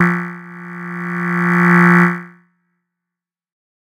This is one of a multisapled pack.
The samples are every semitone for 2 octaves.
swell, noise, tech, pad